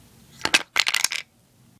A series of sounds made by dropping small pieces of wood.